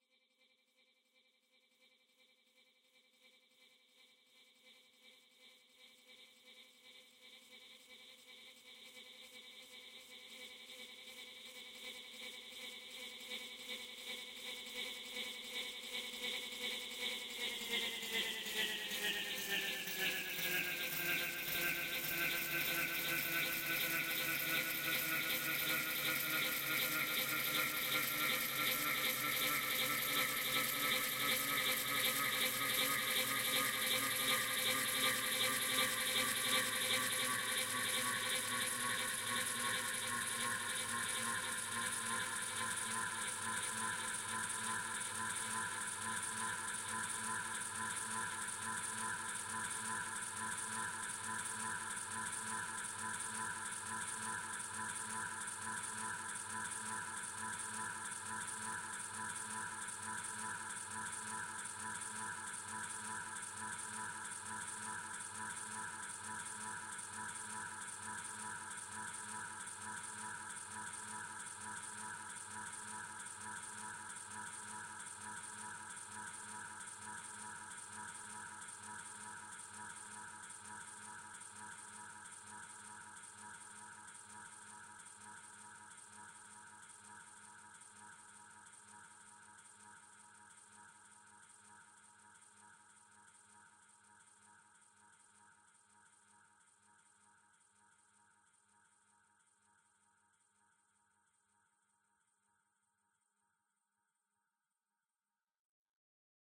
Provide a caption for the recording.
sample to the psychedelic and experimental music.